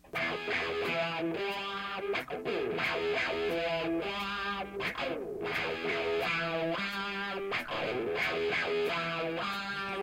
1973 Fender Stratocaster guitar recorded thru 1972 Marshall Plexi head mic'd with a Sennheiser 421 through a Spectra Sonics 110 Mic Pre compressed with Urei LA-3 and recorded on a 2" Analog 3M 24-track recorder at 30IPS. Guitarist is Ajax